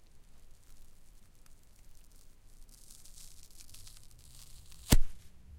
rustle.Foam Rip 1
recordings of various rustling sounds with a stereo Audio Technica 853A
foam, noise, rip, rustle, scratch